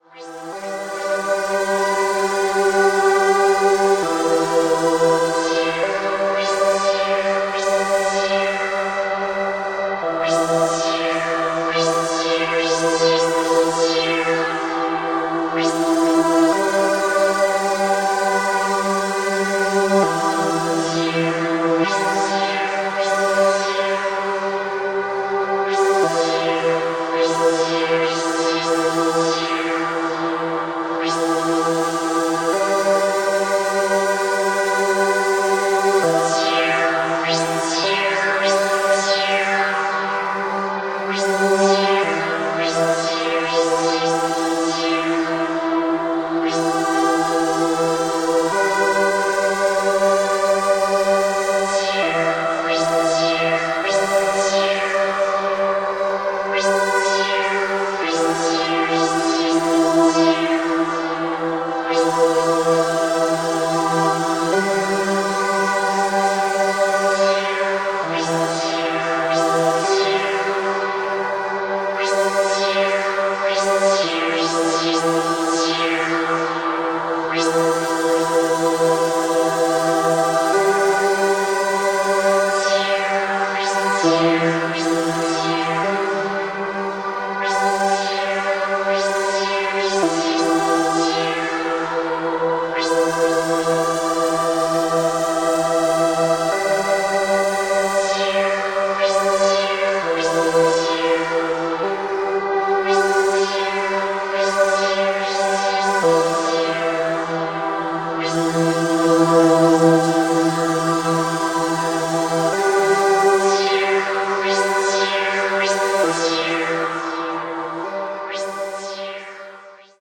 1.This sample is part of the "Padrones" sample pack. 2 minutes of pure ambient droning soundscape. Sweeping atmosphere, again very ambient.